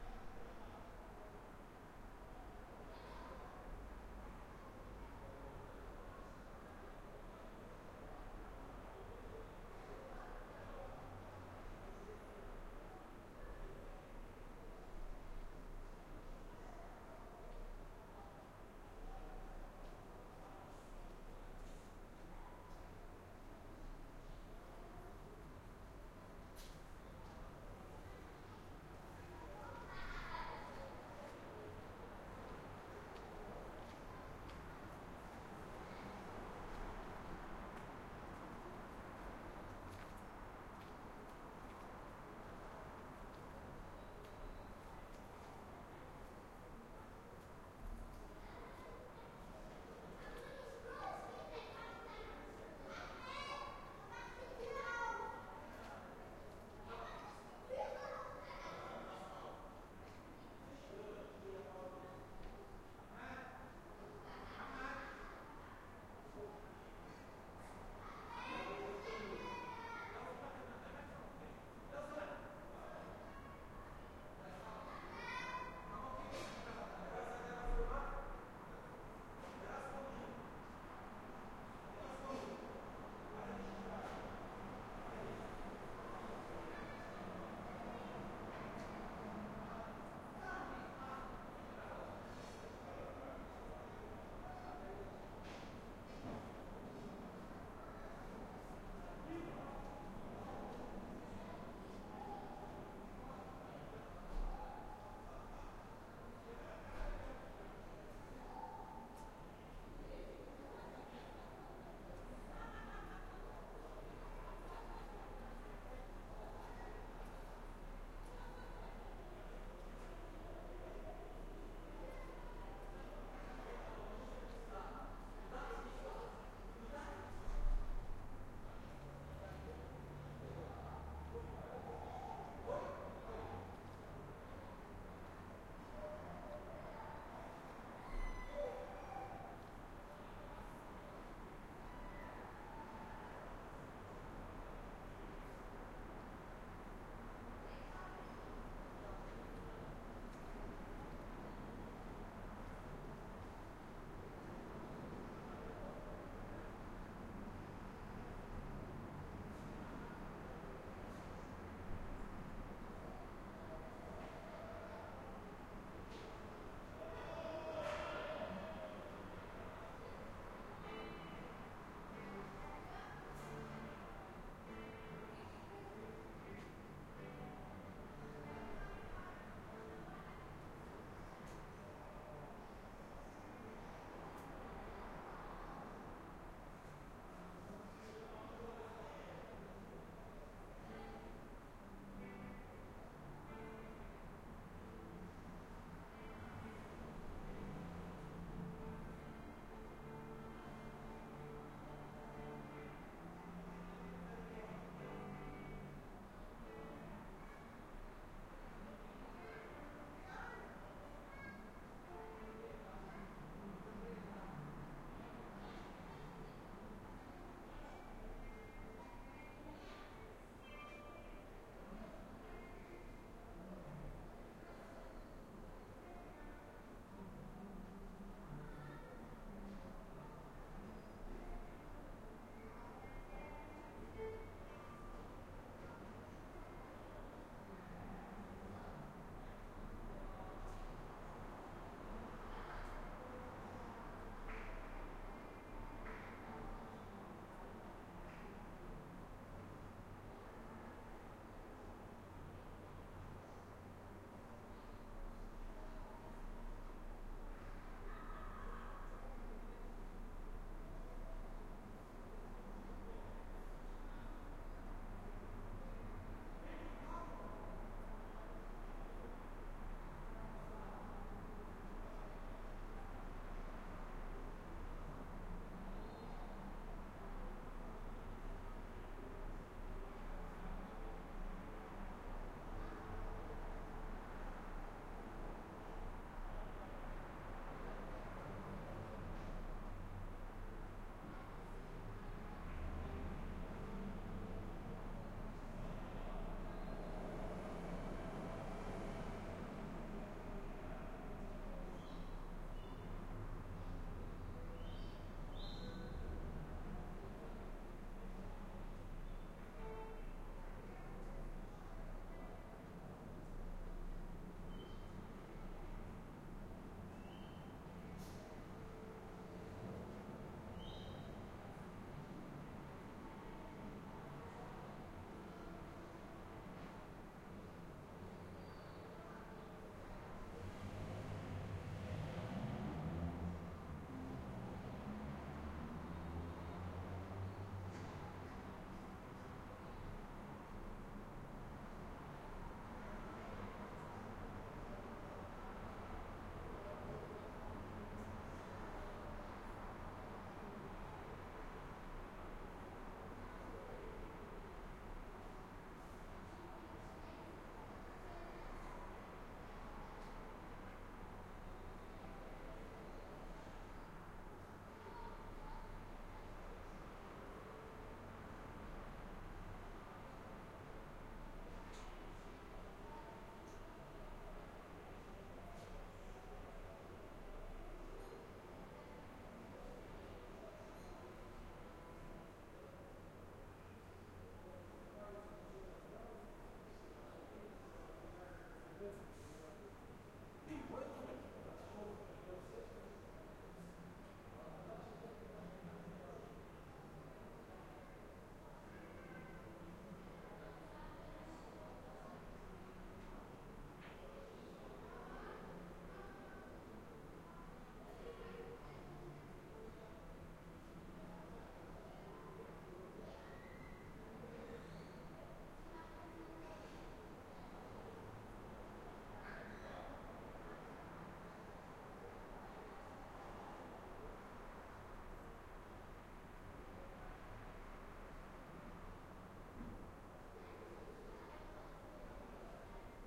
Berlin City Courtyard in the evening
Evening recording in a city courtyard in Berlin.
Sometimes you hear the main street, people talking and guitar sounds. Also the typical noise of a city.
Recorder: Tascam DR-100 MkII
Location: Berlin, Charlottenburg, Courtyard
Time: 24.05.2014 ~22:00
Weather: cloudless, 18°C
Mic: internal unidirectional
berlin, charlottenburg, city, courtyard, crowd, evening, guitar, life, night, noise, people, street, urban